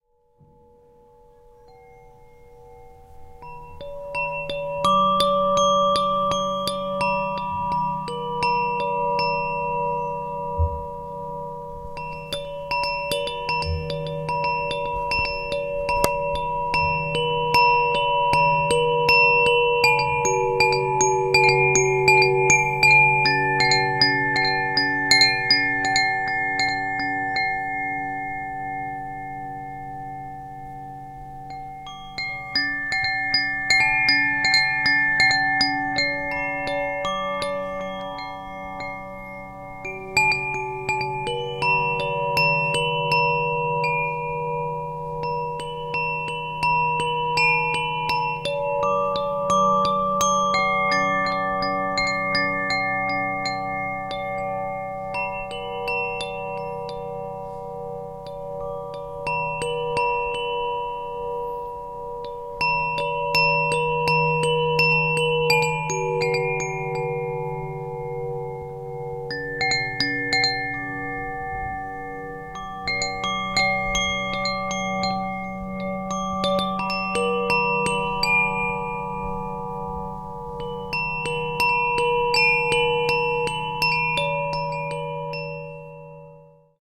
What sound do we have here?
shanti-windchimes
I was wondering how the zoom H2 will record wind-chimes (one of the most beautiful I know) called - "shanti". One day - actually it was the middle of night - I had enough time to check it. The sound is unprocessed, on the beginning has some background interference, but it is long enough to use it.